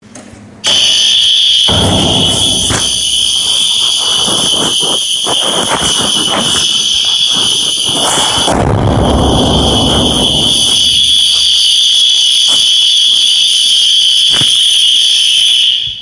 Loud Emergency Exit Alarm
Colorado State University Morgan Library Emergency Exit Door Alarm
Recorded 2014-11-13 12-00-09